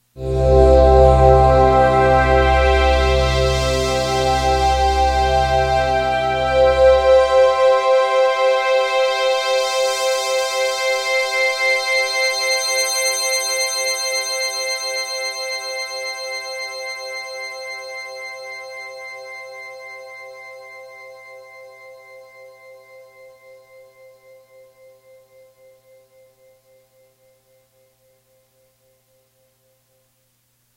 Goodbye, Until Next Time
Here's the perfect suspenseful "goodbye". Works perfectly to conclude until next week. Leave your audience hanging on the edge of their seat only to realize they have to wait until next week to see the conclusion.
Recorded with the Yamaha YPG-525 using the GoblinSynth and Audacity.
No acknowledgment necessary. I understand.
Thank you and have fun!
bye, conclusion, edgy, ending, goodbye, next-week, suspense, suspenseful, the-end